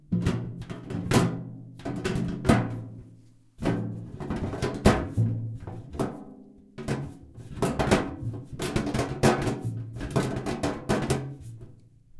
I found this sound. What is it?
Tapping shaking and gently slamming a metal jar.
hit, shake, tapping